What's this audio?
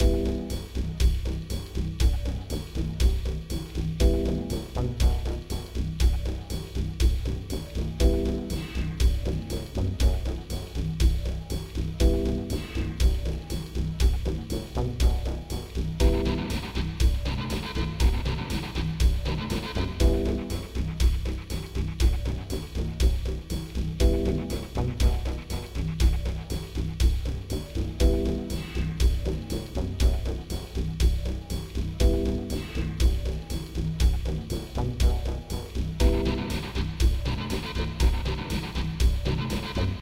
wait for machine
In Am, 120 bpm. Created with Studio One.
Loop for game, film and other. I hope it'll be useful)